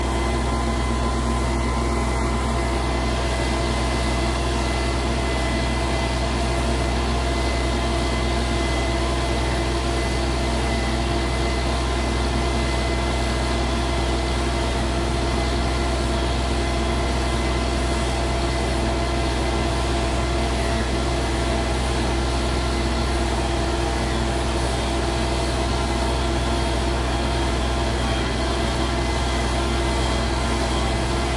A mulch blower I passed by on the street. Unprocessed. Interesting harmonics inside.